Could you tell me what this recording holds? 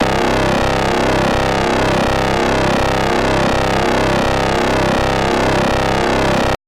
It can be the sound of a spaceship shieldgenerator.